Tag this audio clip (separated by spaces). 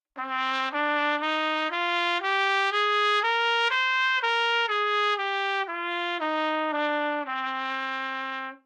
trumpet scale good-sounds neumann-U87 Bnatural minor